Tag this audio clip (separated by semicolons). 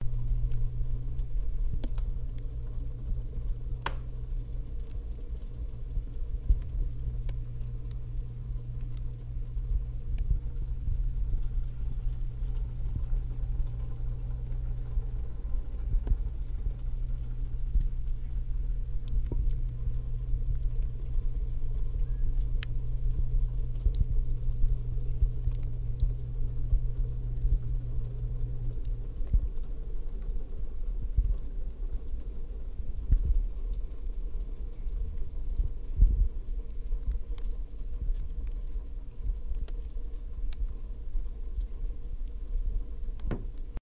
a; Chilled; Crackle; Crackling; Food; freezer; Frozen; Ice; inside; Sounds